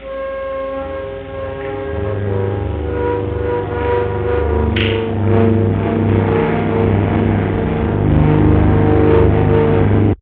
Short ambient clip, sort of classical sounding.